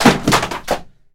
18-Cosas al suelo
Libros tirados al suelo